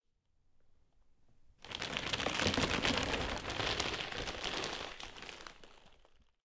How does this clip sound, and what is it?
Removal of waste
Taking out garbage bag
garbage, ZoomH5, bustle, CZ, housework, Panska, Czech